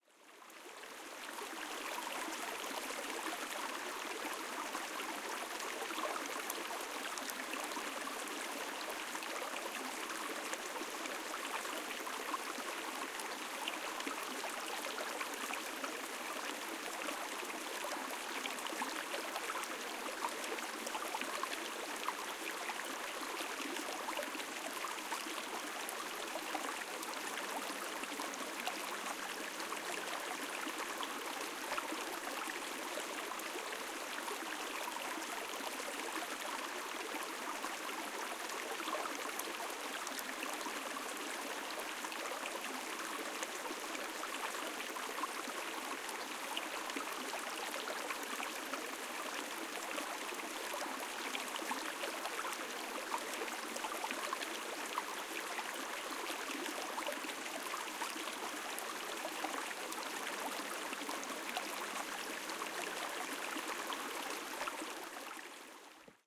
131024 brook black forest
Murmuring, babbling, burbling and brawling brook high up in the Black Forest mountain region, Germany.Zoom H4n
forest, brook, creek, flowing, water, nature